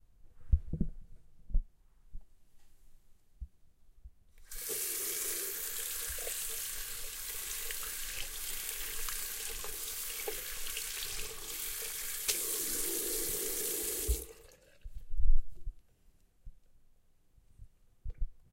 Washing hands in the bathroom sink.
Recorded with Zoom H6